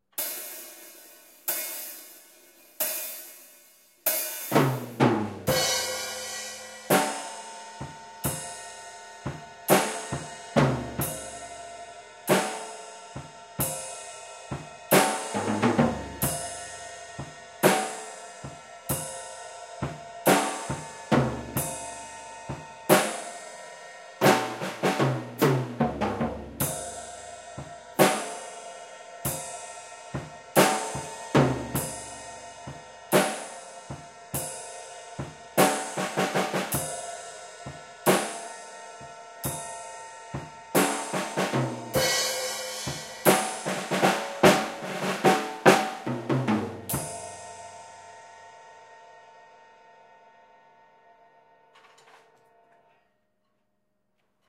Slow heavy drums. Not to any set BPM. I was going for a sort of Type O Negative or Black Sabbath doomy sound.
slow, doomy, heavy